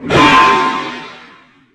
BB Hit2 70x slower
A processed BB hitting a whip cream can. Part of my new '101 Sound FX Collection'
gun, tink, bb-gun, pow, bb, slowed, dink, proccesed, bang, hit, shot